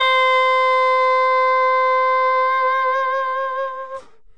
Alto Sax c4 v31
The first of a series of saxophone samples. The format is ready to use in sampletank but obviously can be imported to other samplers. I called it "free jazz" because some notes are out of tune and edgy in contrast to the others. The collection includes multiple articulations for a realistic performance.
saxophone, sampled-instruments, sax, woodwind, alto-sax, jazz, vst